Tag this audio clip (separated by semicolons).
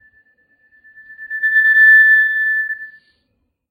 harsh; microphone; noise; oscillating; squeal